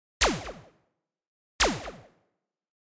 Large beam with significantly more attack
Have fun!
sci-fi, laser